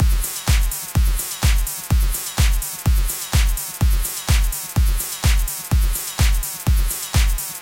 clubgroove 1 - 4 bars
The sound are being made with VST Morphine,Synplant,Massive and toxic biohazzard.